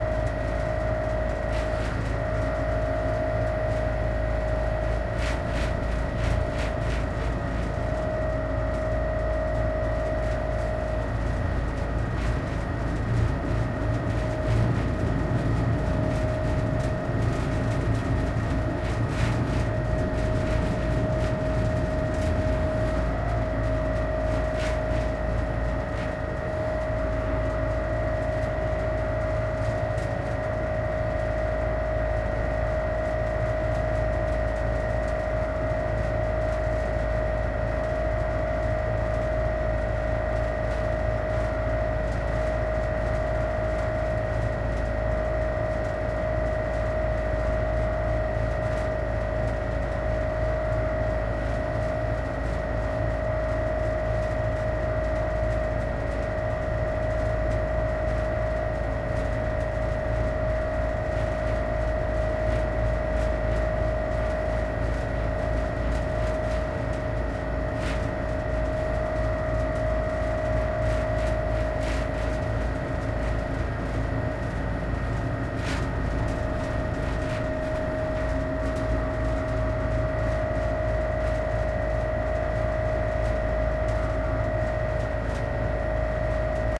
engine vibrations of ferry 2
Engine vibrations of the open ferryboat in Croatia.